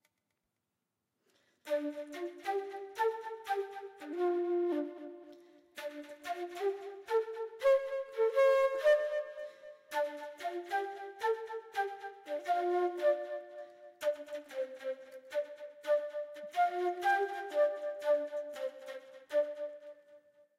Part of a song I recorded with an acoustic flute for a song I wrote.